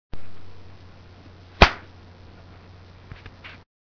me punching my hand.
you will want to trim this one a bit.